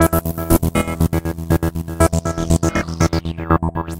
an arp loop created without utilizing Reason's Matrix module. all notes were step-written directly to the sequencer. 3 Malstrom synths were used to create this sound.
electronica, loop, synth